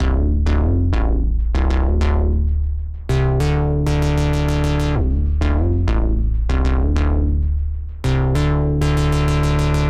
Synth BassFunk Dm 5
Ableton-Bass, Ableton-Loop, Bass, Bass-Groove, Bass-Loop, Bass-Recording, Bass-Sample, Bass-Samples, Beat, Compressor, Drums, Fender-Jazz-Bass, Fender-PBass, Funk, Funk-Bass, Funky-Bass-Loop, Groove, Hip-Hop, Jazz-Bass, Logic-Loop, Loop-Bass, New-Bass, Soul, Synth, Synth-Bass, Synth-Loop